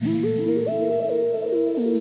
X-Files Synth
Made with a synth and effects. Bit x-filey-ish.
synthesizer, strange, digital, x-files, synth